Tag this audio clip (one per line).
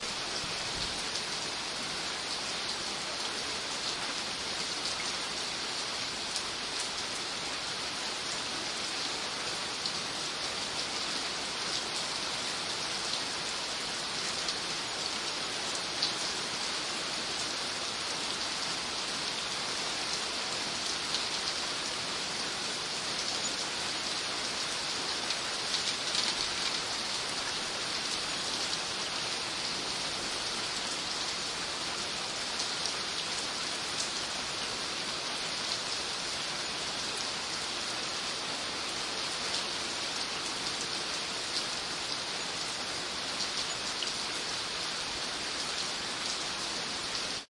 ambience
thunder